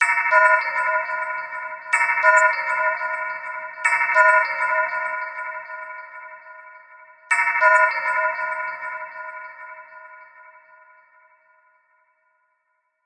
dub bell 006 tremolo
bell/vibe dubs made with reaktor and ableton live, many variatons, to be used in motion pictures or deep experimental music.
bell, dub, experimental, reaktor, sounddesign, vibe